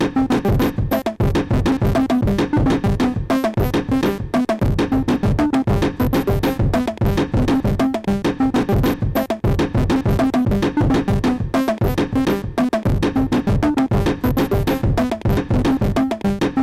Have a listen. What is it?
glitch beat
Weird time signature glitchy beat. Created with Reason and digital drum machine.